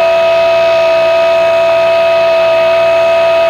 An assortment of strange loopable elements for making weird music. Static from somewhere, probably a cassette recording of a phone call from 1988.